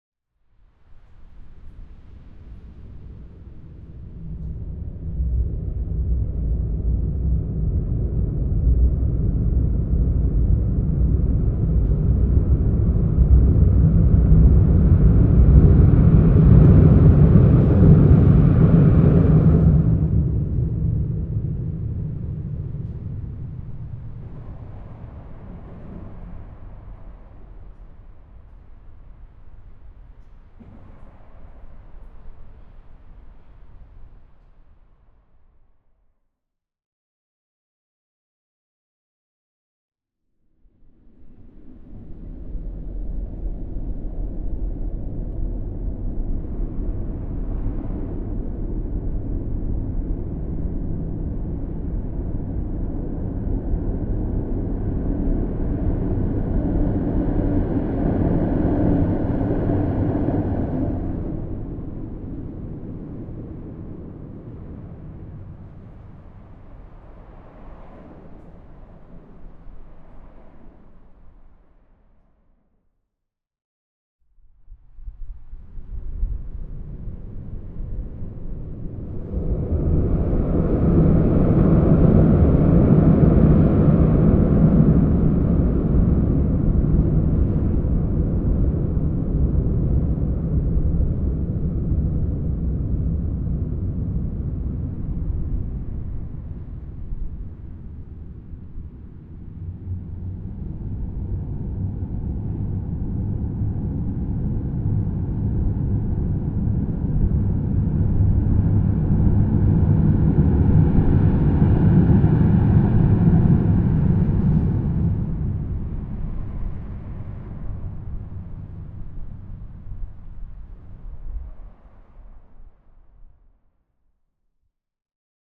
Recording of passing trams made from under the bridge.
First two are made with AB stereo mics pointing towards concrete wall reflection.
Next two are the ORTF stereo pointing towards water.
recorded with Sony PCM-D100